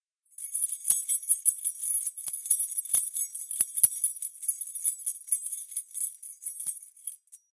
chain shaking recorded
cute, fast, object-recording, motion